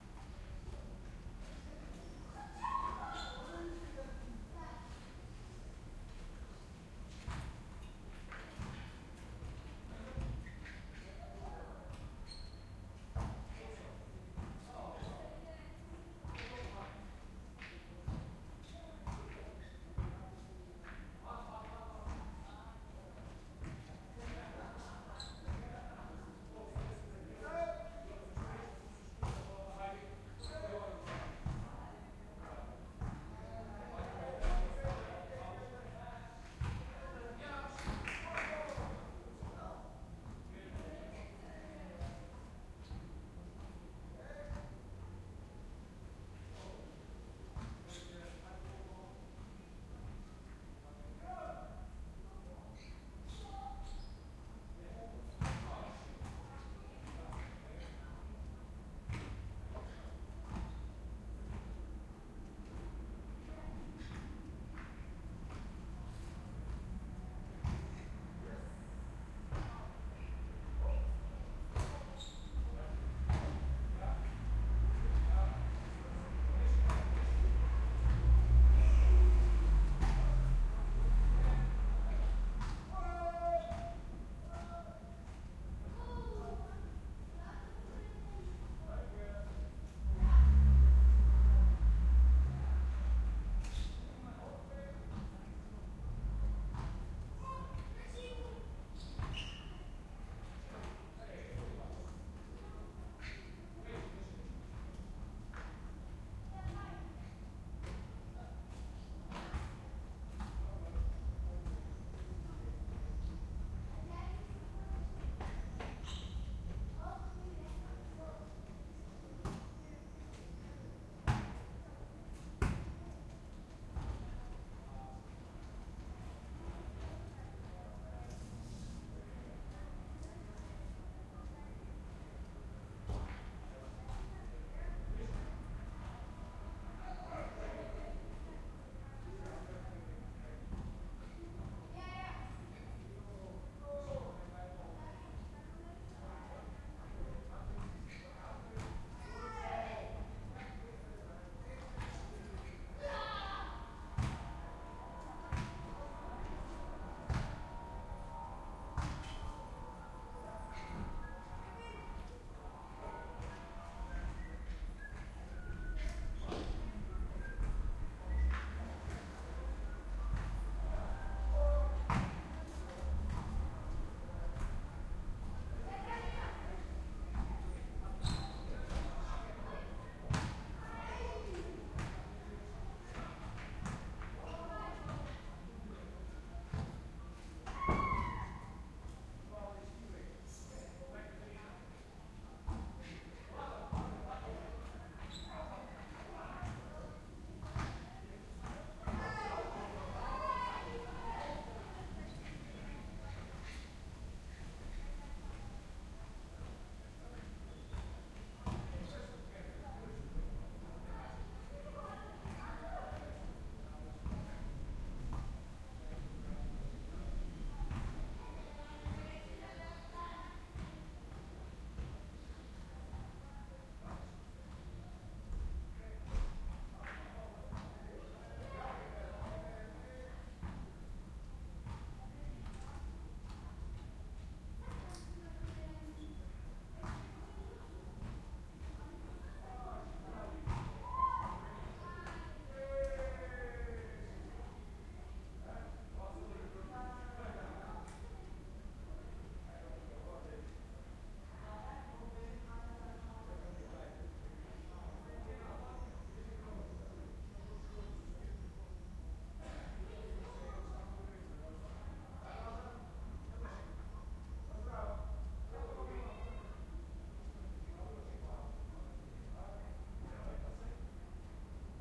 roomtone ball outside
ambience of a living-room with an open window while a group of people are playing with a ball outside.
Elation KM201-> ULN-2.
the-Netherlands, voices, ball, roomtone, background, Dutch, noises